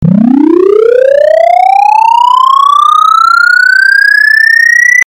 This is a sound of a charging machine.
robot, machine